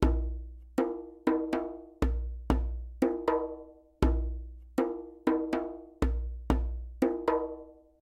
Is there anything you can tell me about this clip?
djembe grooves fanga2 120bpm
This is a basic Fangarhythm I played on my djembe. Recorded at my home.
africa, djembe, rhythm, percussion, drum, ghana